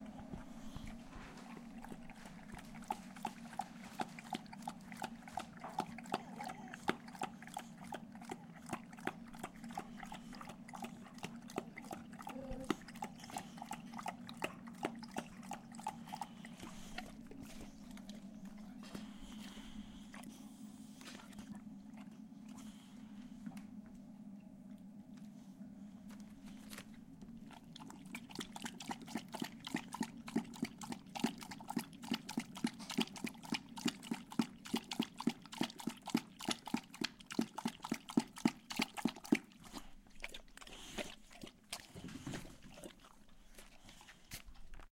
dog drinking Water
Water, dog